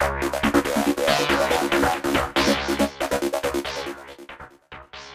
TR LOOP - 0506
goa goa-trance goatrance loop psy psy-trance psytrance trance
psy-trance, psy, goa, goatrance, goa-trance, loop, psytrance, trance